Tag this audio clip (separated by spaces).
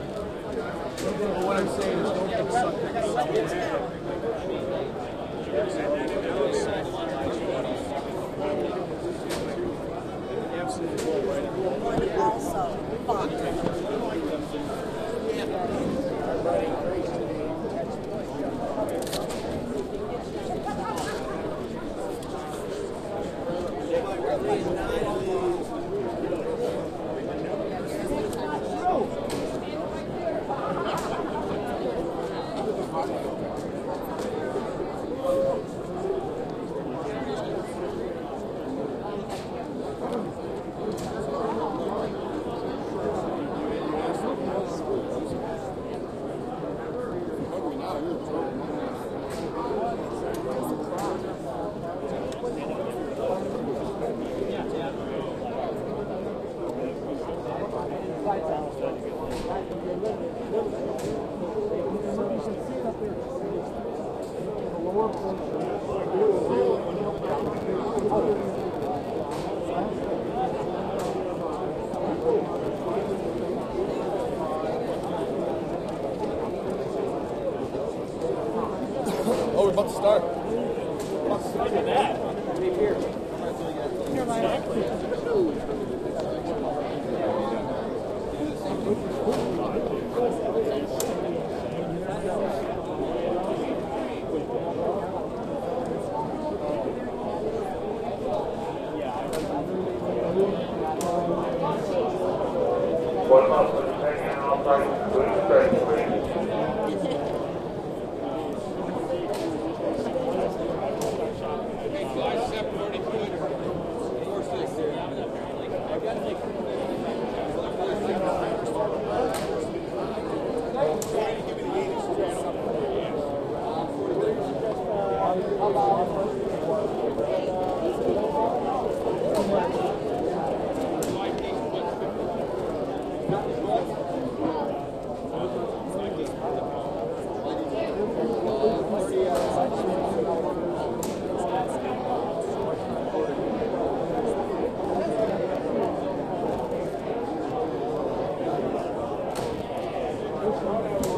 Pit-trading floor-trader floor puts stock-market crash cry money open-outcry options